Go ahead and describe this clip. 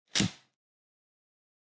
28. aleteo fuerte
aleteo fuerte foley
wings, wind, air